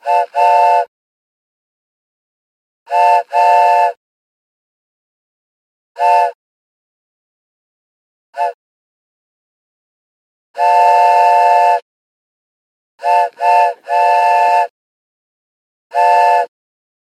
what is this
train whistle toy with contact mic
This is a recording I made using a piezo transducer, or contact mic, in direct contact with a wooden train whistle toy. As the pickup is in direct contact with the wood, this recording is acoustically dead, so you can apply your own environment or effects. The contact mic was hooked up directly to my Zoom h4 recorder.